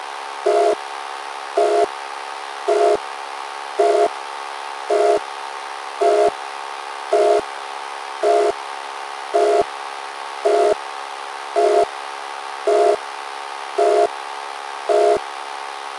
Systems Faliure Alert
A degraded alert signal transmission from a long lost civilization in the depths of space, or are they?